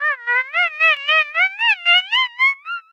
sonokids-omni 25
abstract, analog, analogue, beep, bleep, cartoon, comedy, electro, electronic, filter, fun, funny, fx, game, happy-new-ears, lol, moog, ridicule, sonokids-omni, sound-effect, soundesign, speech, strange, synth, synthesizer, toy, weird